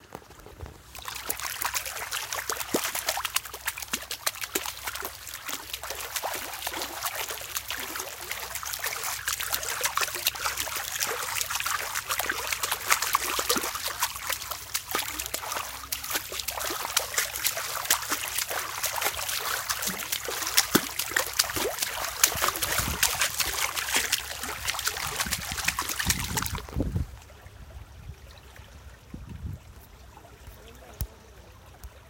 Legs splashing in flowing water 1
legs splashing in water
legs, flowing